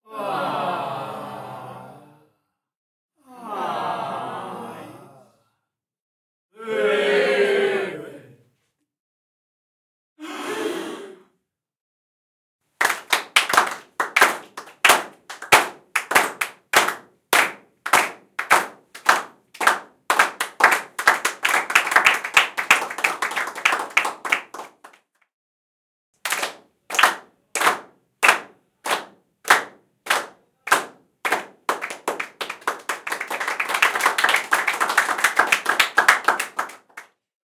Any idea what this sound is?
Small crowd reactions
A couple of small crowd (male and female) reactions:
1. Tender surprise 1
2. Tender surprise 2
3. Disapproval / mild anger 1
4. Surprise
5. Rythmic applause to cheerful applause 1
6. Rythmic applause to cheerful applause 2
Recorded by students of Animation and Video Games from the National School of arts of Uruguay.